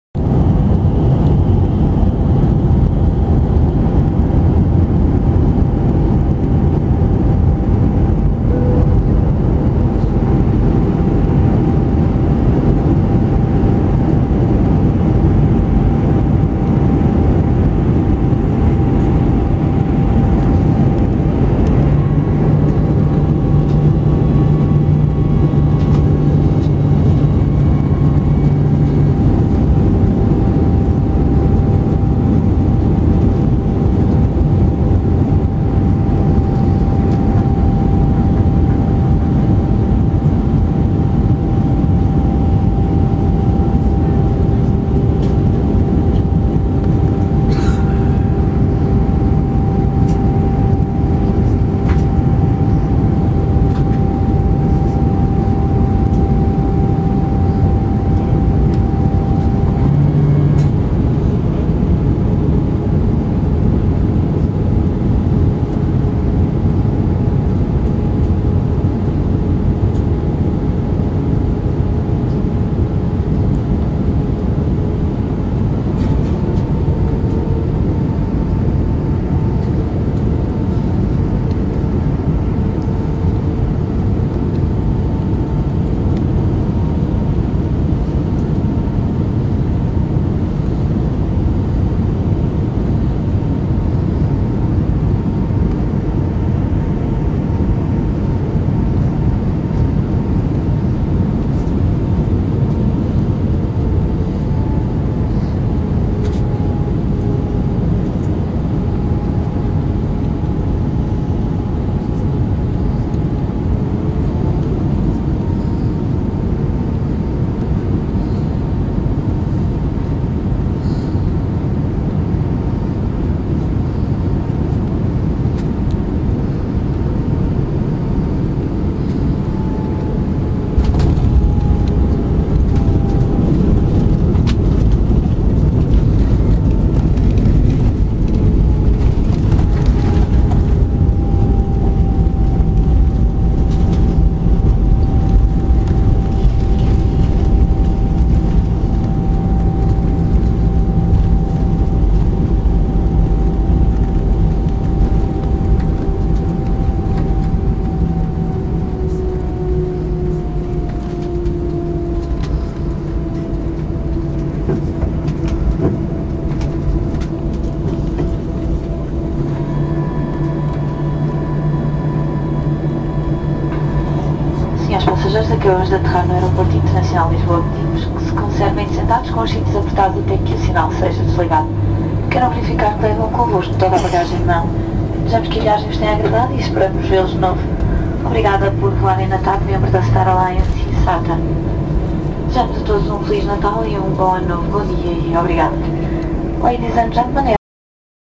Plane (Tap Portugal) Landing in Lisbon. With flight attendant speaking at the end.
lisbon, talking, flaps, announce, flight, landing, plane, attendant